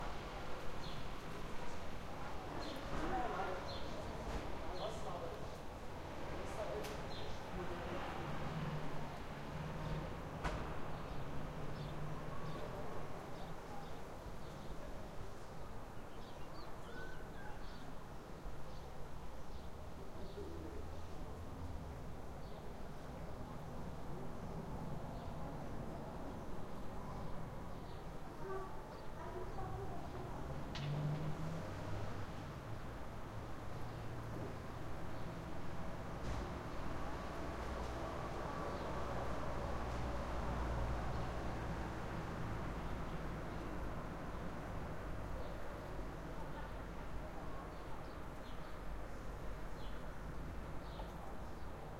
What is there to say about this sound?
Birds in the town with Cars in the background 2
You can hear some birds singing ans some people talking.
In the background you can hear the typical sound of a city.
A car is passing.
Berlin, Birds, Car, City, People, Wind